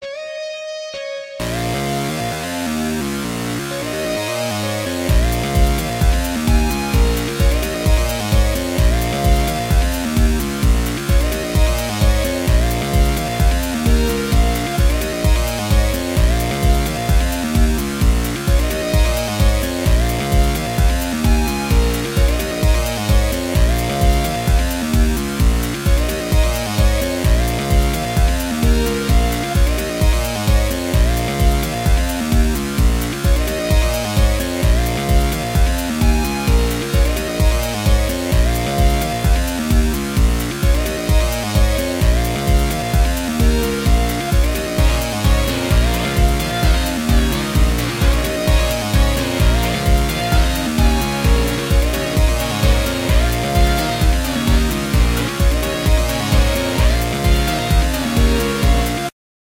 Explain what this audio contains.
Synth Guitar Beat Music
Music, Free, Beat, Guitar, Sound, Synth